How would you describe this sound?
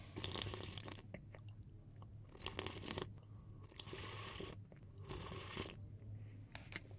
drinking soup with spoon
soup, spoon